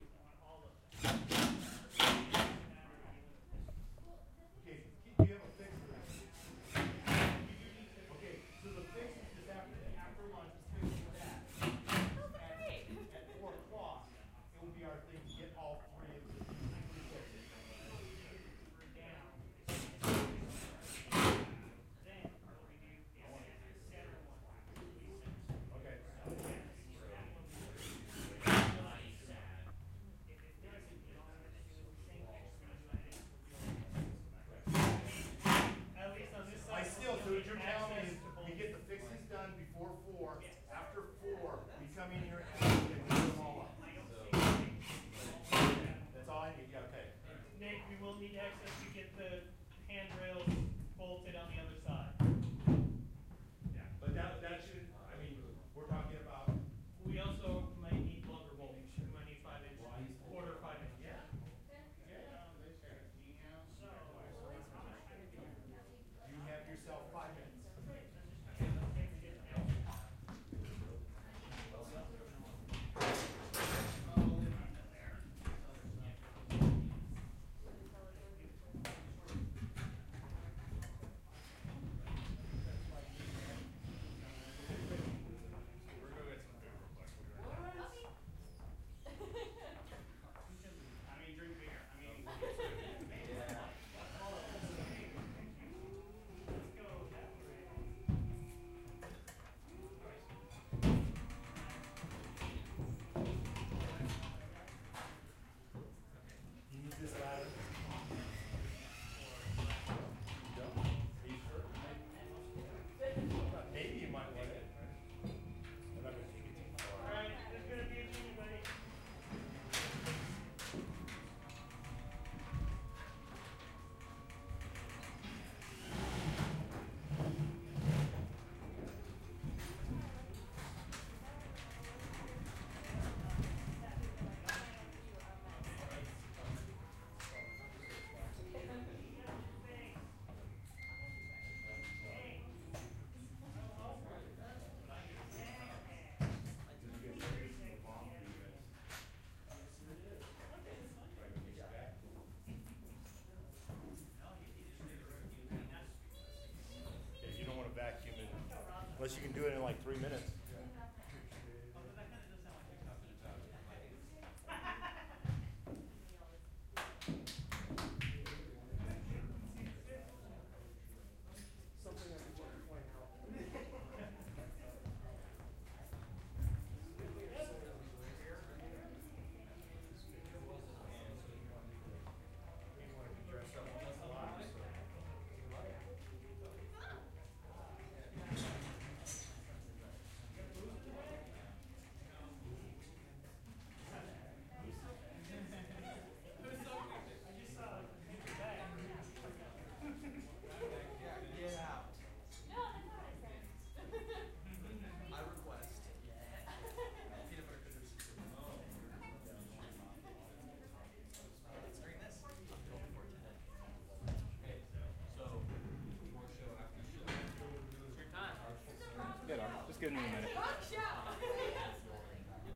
Recorded with a Zoom H6 w/ MSH mic. Recorded before a run of a show. Carpenters working on putting railing onto platforms. Near the end a genie lift is traveling across the stage.